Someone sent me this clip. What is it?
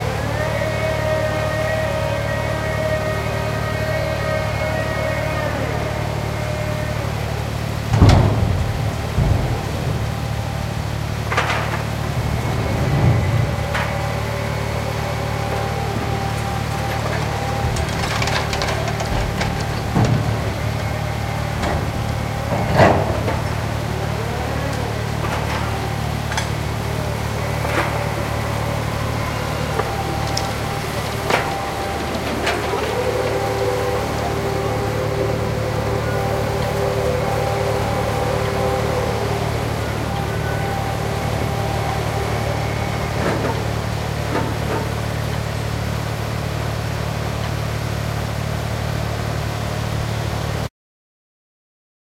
Crane ship unloading sand 1
A large crane unloading sand form a boat on a river near Delft. There also tiny sound of a duck, left near the end of the recording. Recordings made with a Zoom H2n with the microfoons set for MS-recording. MS-decoding is done manually.